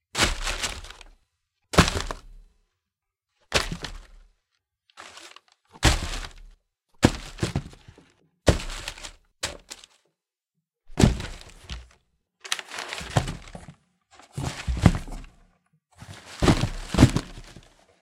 Foley sound of "angry packing," throwing objects into a box roughly. No breaking noises or anything over the top. Also suitable for someone rummaging through a clutter of objects or ransacking someone's home. Recorded inside a treated room with a Shure SM58.
pack, ransack, rummage, rustle, unpack